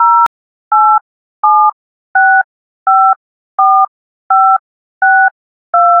dial a phone number.
Created with Audacity